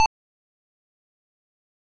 enemy red
pong, beep